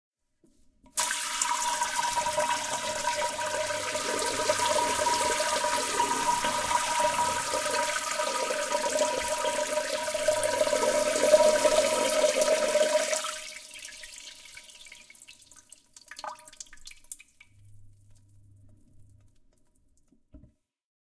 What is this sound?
20190102 Spraying Water into Toilet 3
bathroom, spray, toilet, water